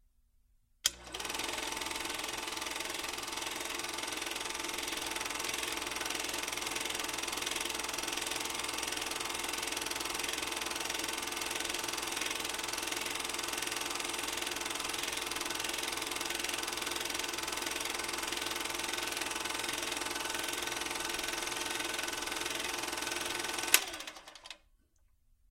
8mm Projector Running (Bell and Howell Model 253B)
The sound of a Bell and Howell Model 253B 8mm projector being switched on, running, and being switched off.